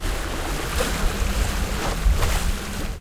Giant breathing 27
One in the series of short clips for Sonokids omni pad project. A short clip of sea splashing near the Sea organ.
sea-organ, sonokids-omni, field-recording, breathing, giant